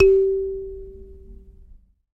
a sanza (or kalimba) multisampled